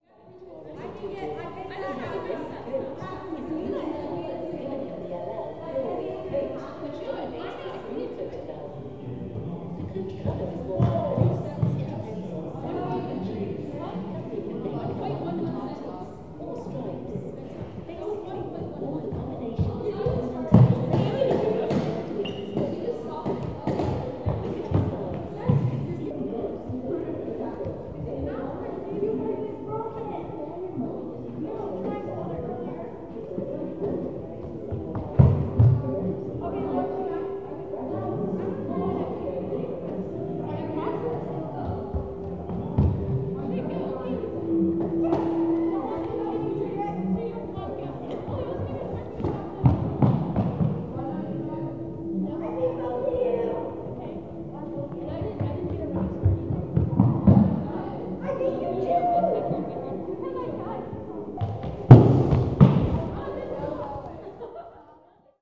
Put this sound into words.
musee de la civilisation quebec 09.05.17 003
09.05.2017: Musee de la civilisation in Quebec in Canada. Sounds of exhibition - general ambiance.
audio, Canada, exhibition, field-recording, museum, people, Quebec